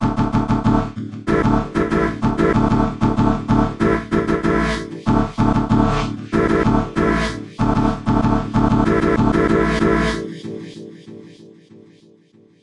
hip hop20 95PBM
broadcast, move, drop, pbm, sample, hip-hop, beat, podcast, dance, mix, loop, radio, rap, chord, part, background, dancing, pattern, stabs, club, instrumental, intro, stereo, trailer, interlude, disco, sound, jingle, music